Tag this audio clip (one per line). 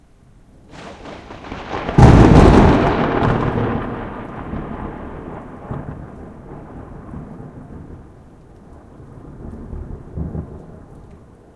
thunder-clap
lightning
field-recording
thunder
thunder-roll
weather
storm